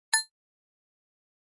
Short ding noise created using GarageBand on Mac.